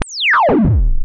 Space Gun 031
Space gun FX sound created with Created using a VST instrument called NoizDumpster, by The Lower Rhythm.
Might be useful as special effects on retro style games.
You can find NoizDumpster here: